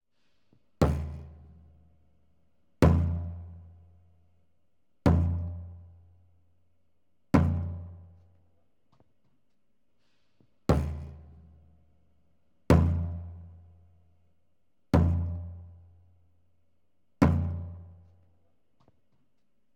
Tapping heavy Empty drum 1
Tapping heavy empty steel drum
Factory, Industrial, Metal, Metallic